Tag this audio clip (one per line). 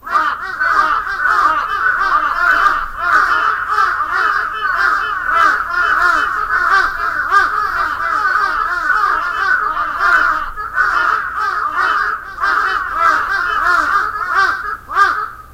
crows
crow
horror
birdsong
bird
field-recording
forest
birds